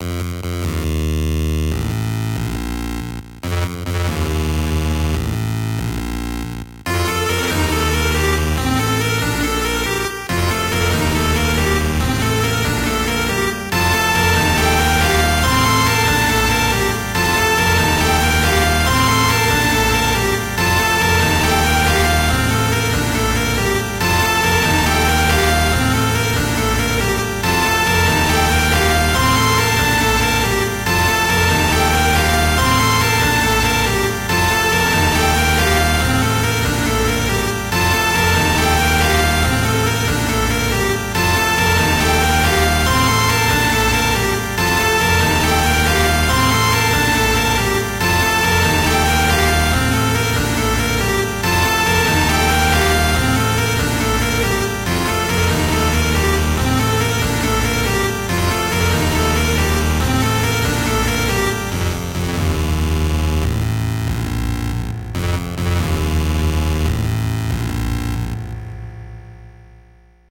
Press Start (1)
title, select, game, 8bit, computer, screen, start, retro, chiptune, videogame, beep, arcade